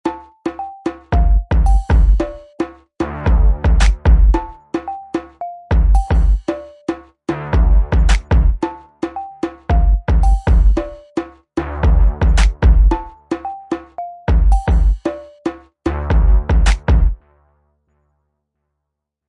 Hip Hop beats, design´t to be use´t as it is, or to be cut in to pieces.
hip-hop, beat